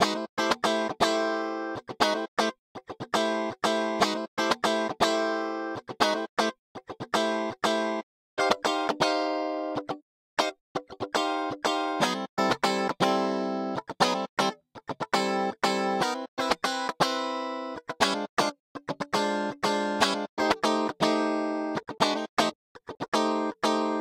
Song1 GUITAR Do 4:4 120bpms
bpm, rythm, Guitar, 120, HearHear, loop, Do, Chord, blues, beat